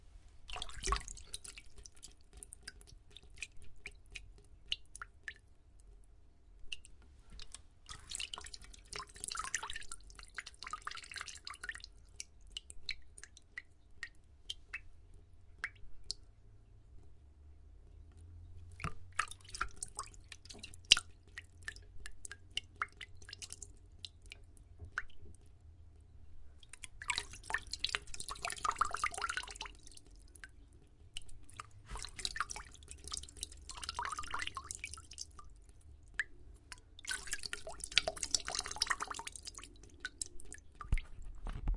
Water Dripping 05
Water dripping. Recorded with Zoom H4
soundeffect, water